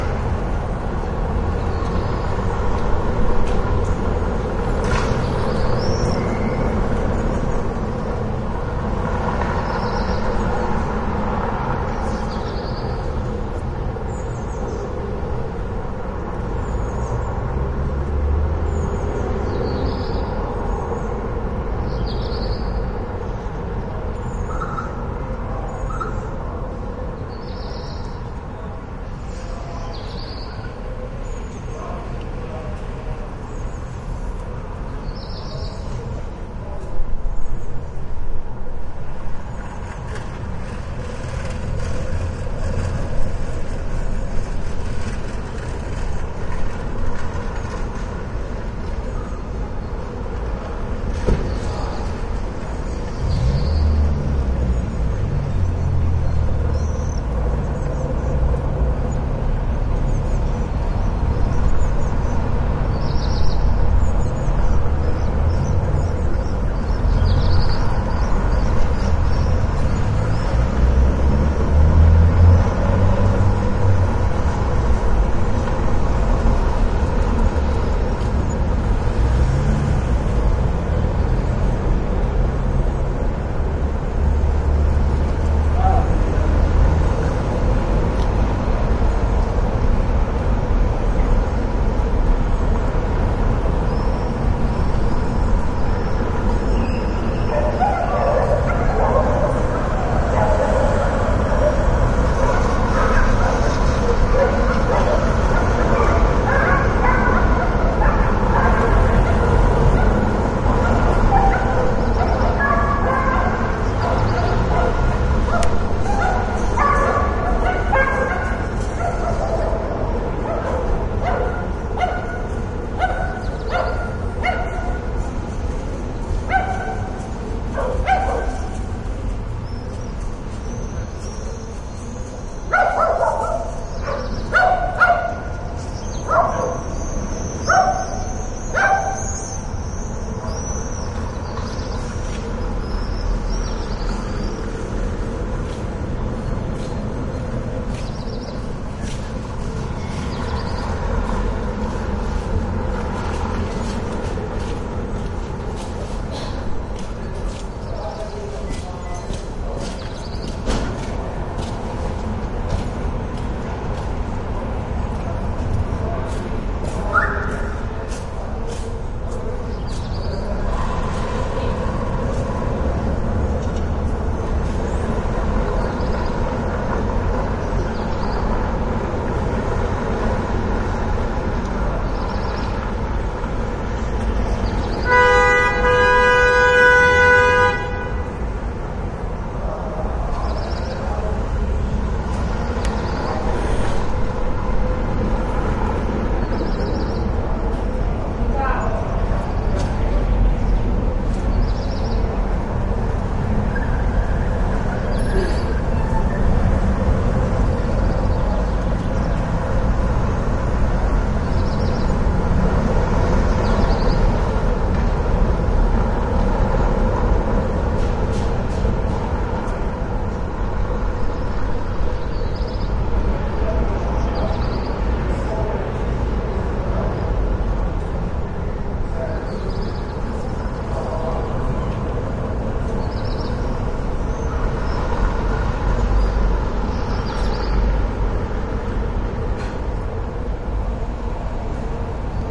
Сity in the afternoon - downtown area
field-recording, ambience, soundscape, Lviv, summer, center, downtown, from, many, street, city, people, noise, atmosphere, town, traffic, dogs, ambient, window, outdoor, day, bowwow, Ukraine